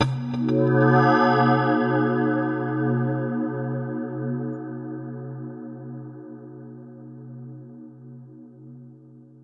Jingle-like sound effect that indicates something mysterious happened in space.